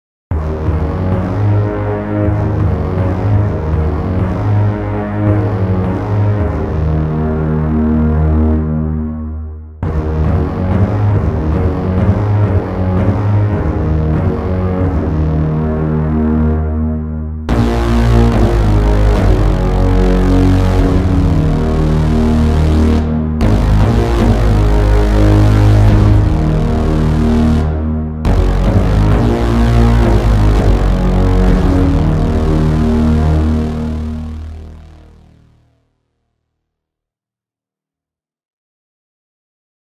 Wild Electronic West
Electronic sound and brass sounds like in old western movies.
Hello, I'm from Russia. I created this sound and want to share it with the audience, maybe you will need it, Enjoy.